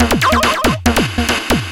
140bpm Jovica's Witness 1 1
electro, 140bpm, experimental, weird, jovica